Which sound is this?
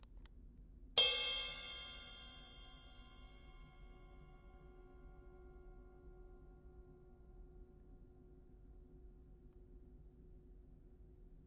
A cymbal recorded in my house with a field recorder
bell, cymbal, ride